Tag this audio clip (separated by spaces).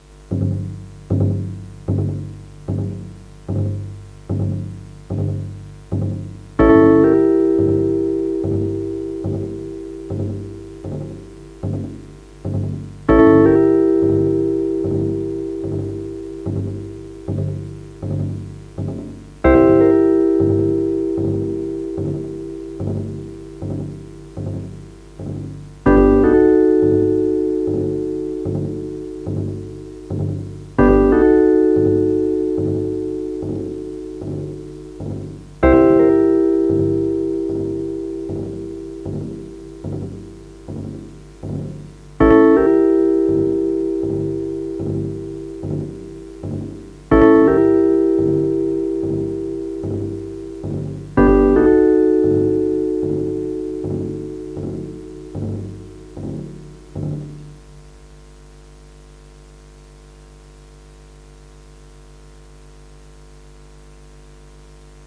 feelings mood emotion